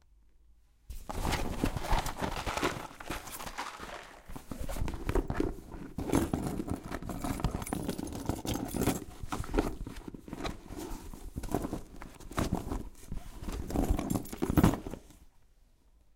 Bag stuff
Recored by Sony dictaphone. Searching for something in your bag.
bag, by, dictaphone, Recored, Searching, something, Sony, your